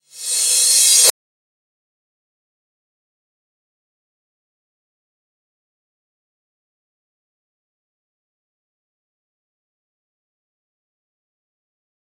Reverse Cymbals
Digital Zero